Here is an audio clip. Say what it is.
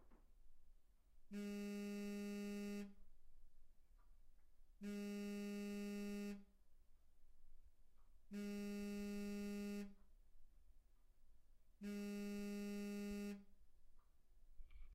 Cell phone vibrates on a wooden table.